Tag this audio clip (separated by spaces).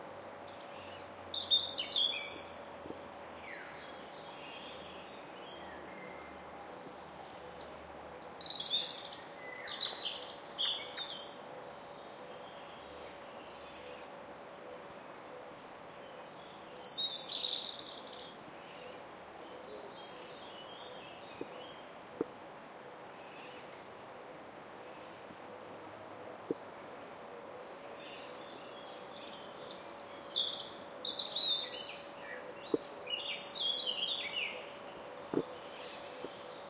birds canyon field rjecina